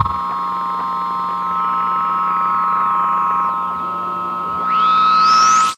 Short radio 'burst'. Somewhat noisy and perhaps useful as percussion.